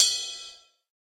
Drum kit sampled direct to my old 486DX no processing unless labeled. I forget the brand name of kit and what mic i used.
kit, drum